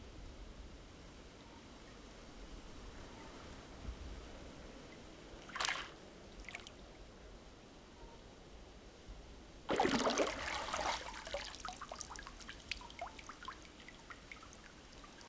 dunk water 009
Person going under water, baptize, dunk
stream, river, gurgle, water, drown, dunk, baptize, submerge